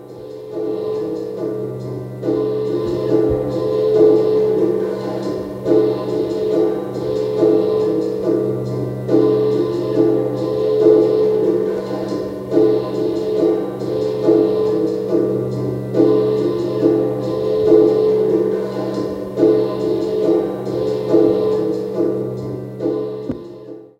Gong being used as a plate reverb. That is, sound is being sent into the gong with a speaker.